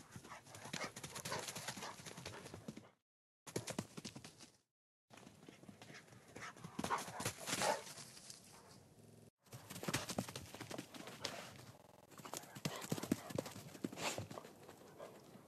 Wolf run
90% speed of my dog running. sounds like wolf. I'm Panning as he passes.
dog, run, wolf